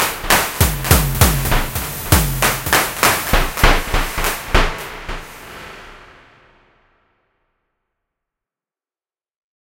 20140316 attackloop 120BPM 4 4 Analog 1 Kit ConstructionKit ElectronicPercussion14
This loop is an element form the mixdown sample proposals 20140316_attackloop_120BPM_4/4_Analog_1_Kit_ConstructionKit_mixdown1 and 20140316_attackloop_120BPM_4/4_Analog_1_Kit_ConstructionKit_mixdown2. It is an electronic percussion loop which was created with the Waldorf Attack VST Drum Synth. The kit used was Analog 1 Kit and the loop was created using Cubase 7.5. Various processing tools were used to create some variations as well as mastering using iZotope Ozone 5.
electronic, percussion, ConstructionKit, loop, dance, rhythmic, electro, 120BPM